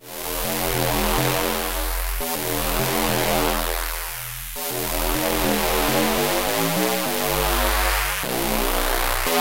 biggish saw synth e e g b 102 bpm-21
biggish saw synth e e g b 102 bpm